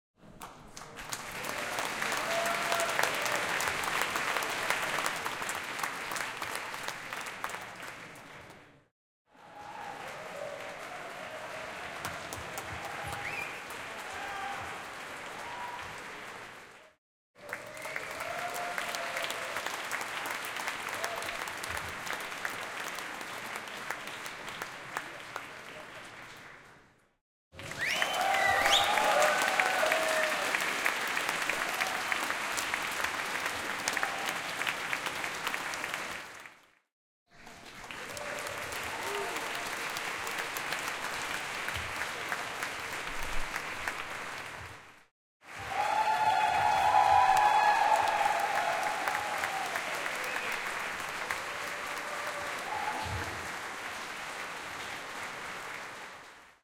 Applause recorded during Amaze festival 2015.